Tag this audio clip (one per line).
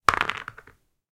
ambient
dice
misc
noise